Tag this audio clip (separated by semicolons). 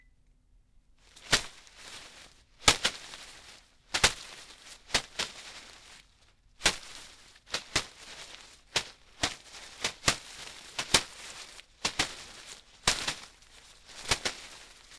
monkey; shaky; steps; branch